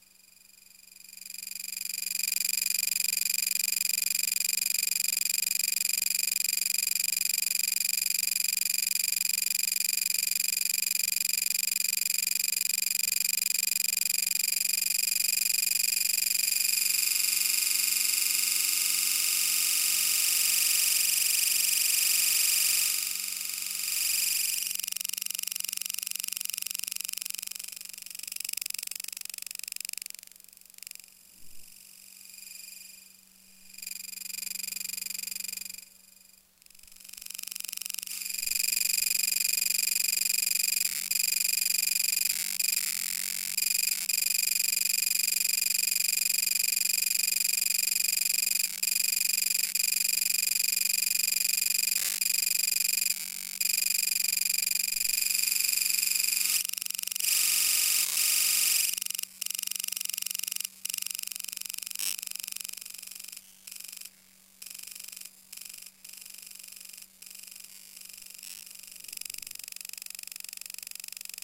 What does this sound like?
IBM library terminal
recorded with coil mic
recording, emf, sound